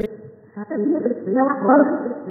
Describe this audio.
a sound made by compressing and warping and reversing simple vocals , like "hello" and "how are you" can be used for creepy alien sounds, or computer voices, made using the free audacity